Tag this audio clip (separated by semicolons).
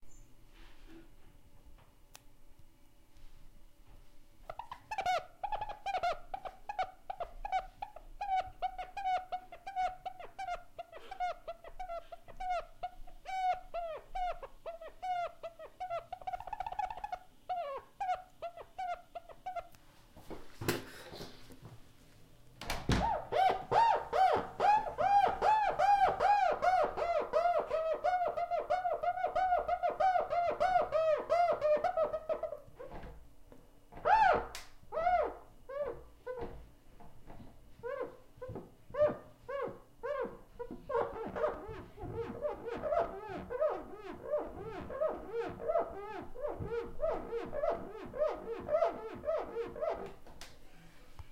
glass; skin; squeak; squeaking; wet; wipe; wiping